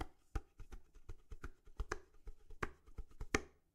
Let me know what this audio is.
Stomping & playing on various pots